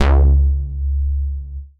SYNTH BASS 0106
SYNTH BASS SAW
saw, bass, synth